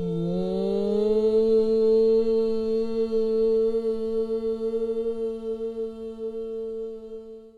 After recording 2 soundtracks of a voice (high-pitched and low-pitched), I've selected the interesting part, and applied to it a cross fade out. Then I've mixed the soundtracks together.